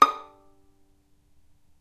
violin pizz non vib D#5
violin pizzicato "non vibrato"
non-vibrato, pizzicato, violin